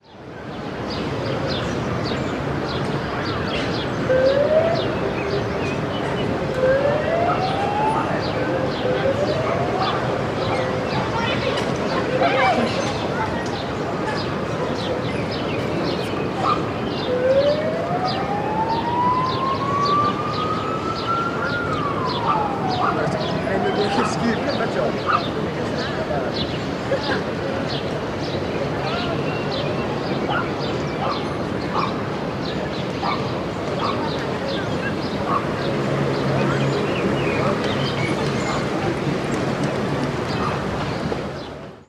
Set of recordings made for the postproduction of "Picnic", upcoming short movie by young argentinian film maker Vanvelvet.
ambience, barcelona, ciutadella-park, exterior, mono, urban, walla